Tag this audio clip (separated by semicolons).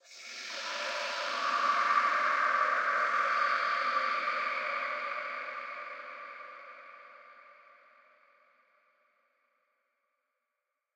ambient; creepy; drone; evolving; freaky; horror; pad; riser; soundscape; sweep; zombie